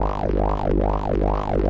sherman cable82
I did some jamming with my Sherman Filterbank 2 an a loose cable, witch i touched. It gave a very special bass sound, sometimes sweeps, percussive and very strange plops an plucks...
ac, analog, analouge, cable, current, dc, electro, fat, filter, filterbank, noise, phat, sherman, touch